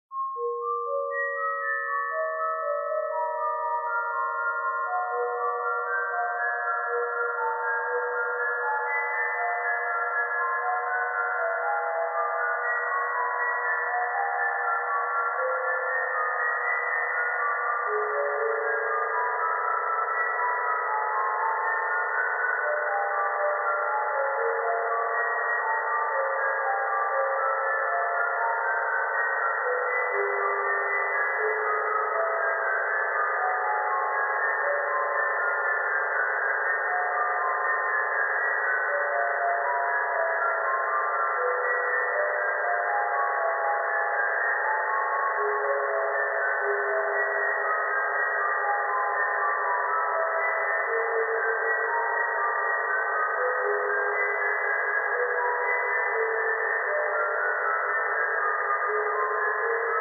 enter mystic cave
I've decided to experiment with random frequencies slowly filling canvas and blending into a single timbre. For this piece they emerge with quite an amount of time and there is no distinct frequency to form a tone. This experimentation produces quite a hauinting sound consisting of small parts.
mystical, haunted, ambient, generative, algorithmic